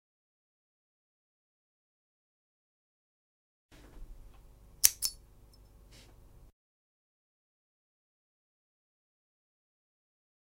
The sound of an alien lizard eye blinking, could also be a metallic click
metallic, click, blink, eye, lizard